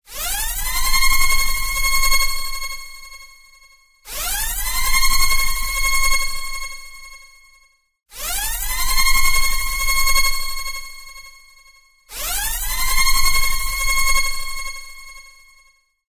Science Fiction SF Air Raid Warning
An electronic klaxon warning - air-raid warning
SofT Hear the Quality
Air-Raid, Alert, Electronic, Klaxon, Emergency, Warning